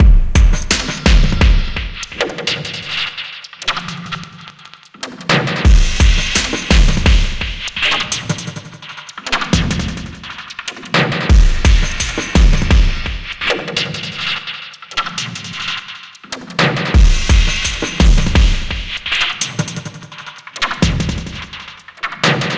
Loop without tail so you can loop it and cut as much as you want.